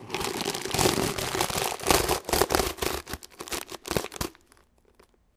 rohr+tüte04
pulling a metal bag out of a metal pipe
bag, metal, pipe, pull, tube